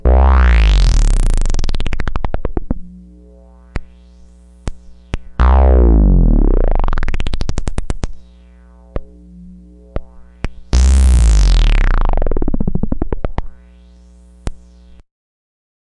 bass stretch 2
Raw analog Bass stretch sounds, oscillating goodness, from my Moog Little Phatty + the CP-251 voltage attenuator plugged into the pitch cv control